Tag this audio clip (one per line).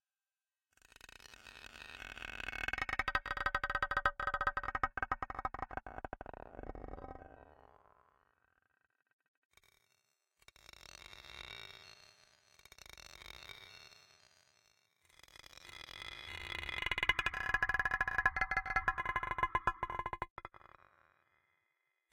weird
Space
Alien
design
digital
fx
Futuristic-Machines
sound
sfx
UFO
Mechanical
Stone
sci-fi
soundeffect
abstract
sound-design
lo-fi
electric
effect
peb
Electronic
Noise
sounddesign
Futuristic
Spacecraft
strange
loop
future
freaky